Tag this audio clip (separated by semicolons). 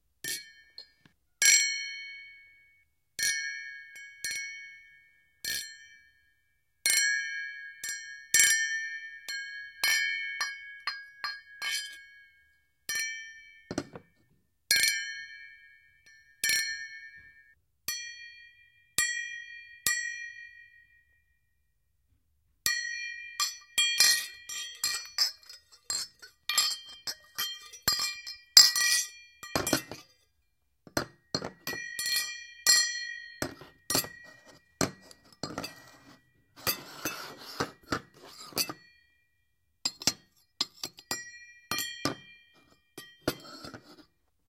sfx; sound; foley; glass; indoor; wine; sounddesign